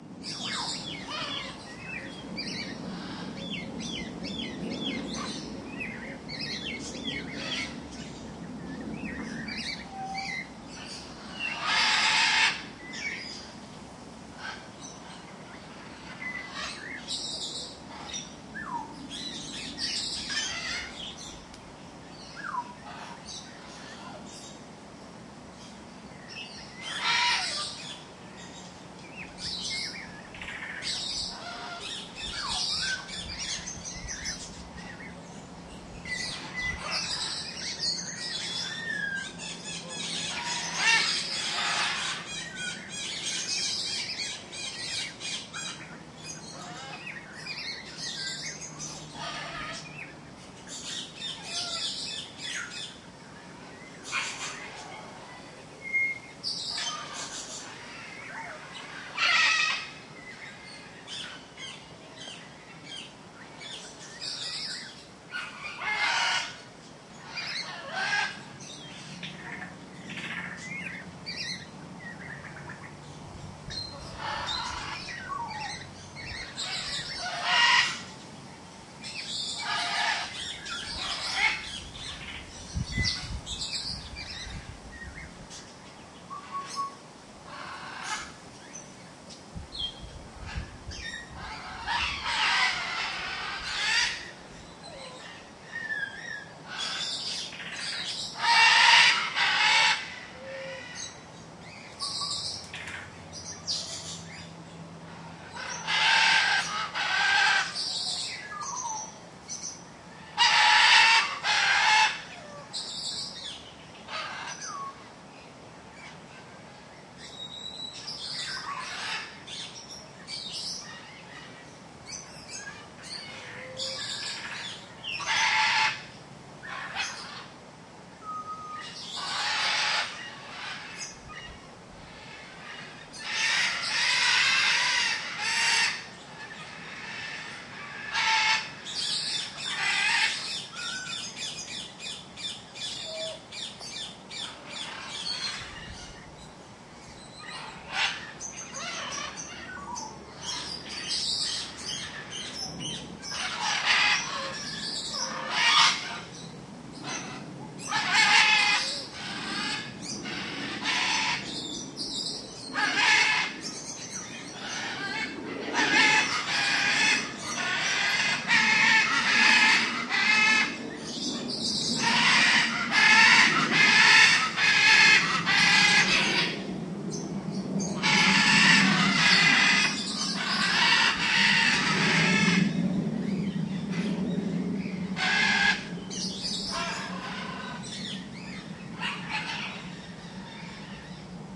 This was recorded at the Parrot Jungle in Miami. This was recorded near the entrance by a row of parrot aviaries for macaws, African gray parrot, ring-necked parakeet, peach-faced lovebird, and a few others. Most of the strange electronic-type sounds are being made by the African gray parrot.